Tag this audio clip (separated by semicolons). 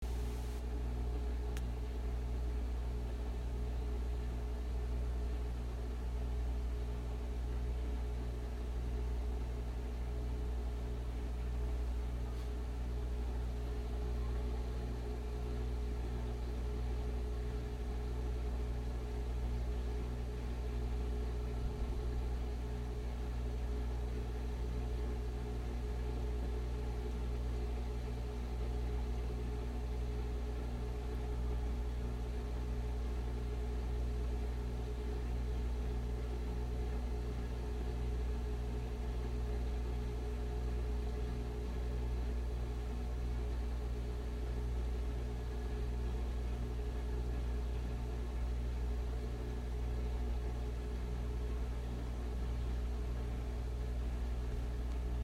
Water; Machine; Running; Fishtank; Whirr